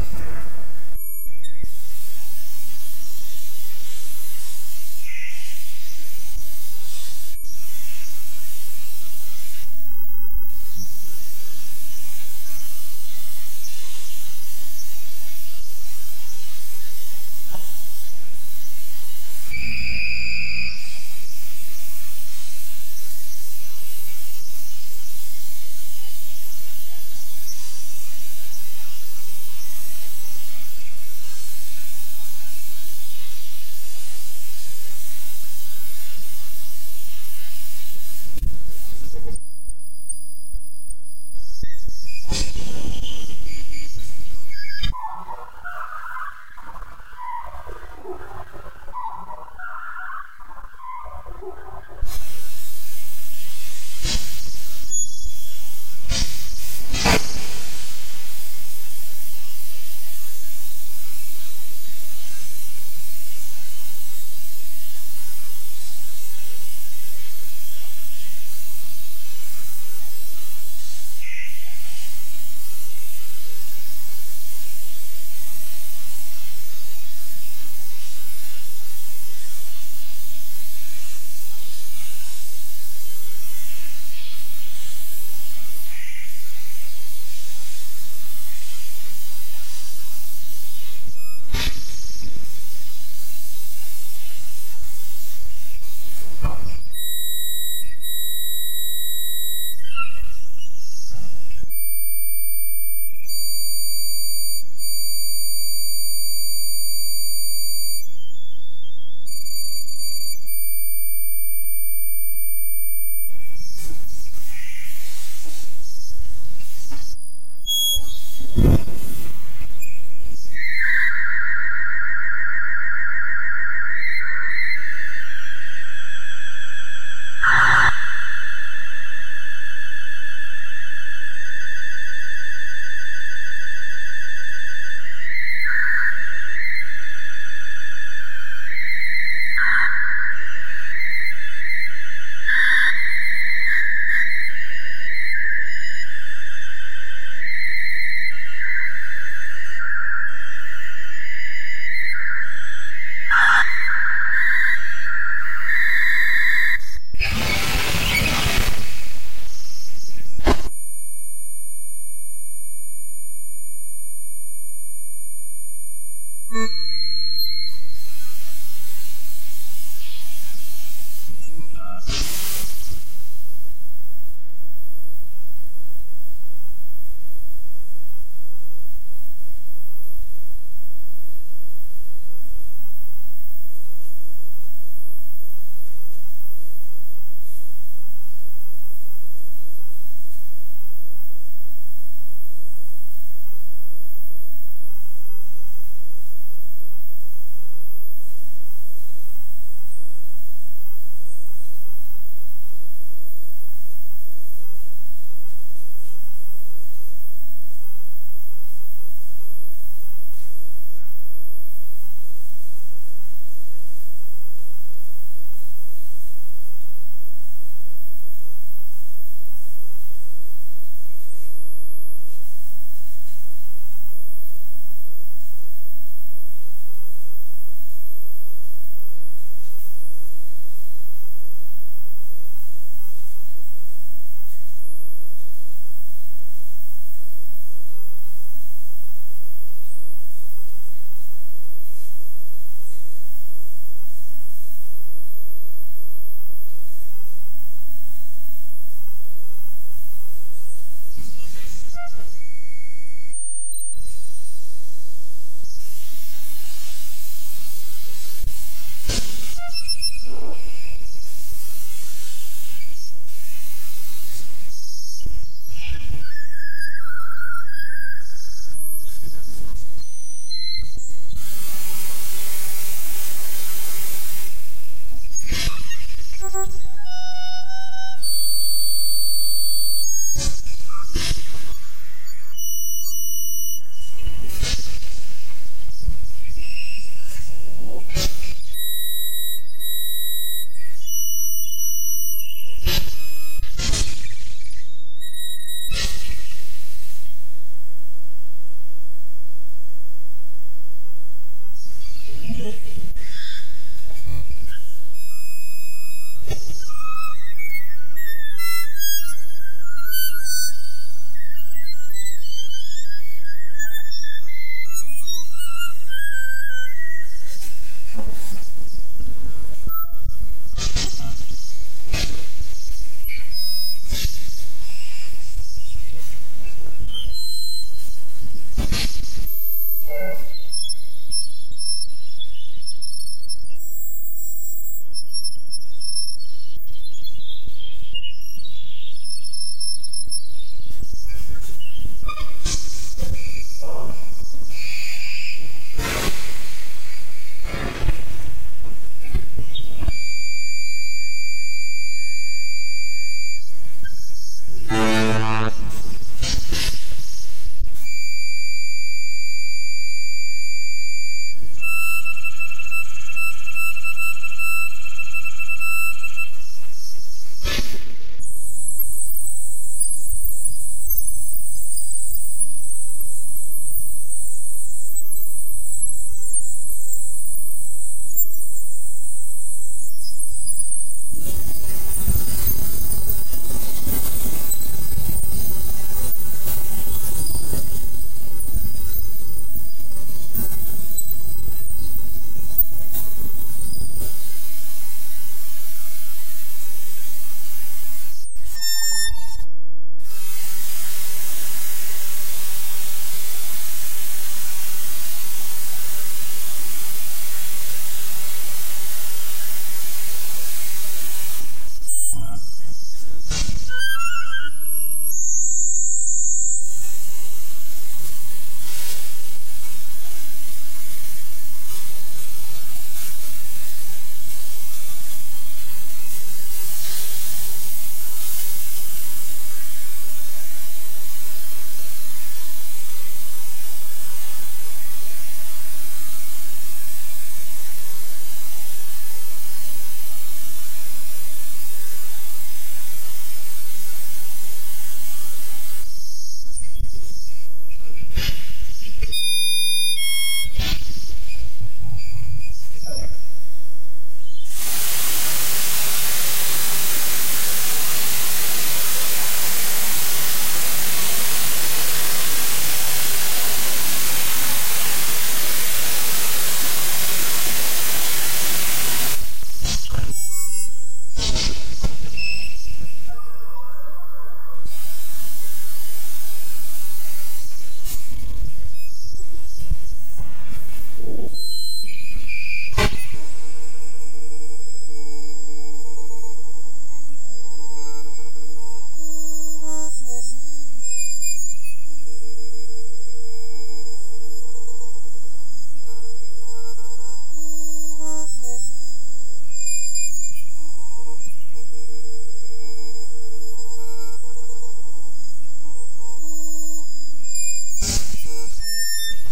dare-26 txt file1
Over 4000 of text files copied to one folder and glued together using command "copy /b *.txt output.raw".
Strongly dehissed, normalized.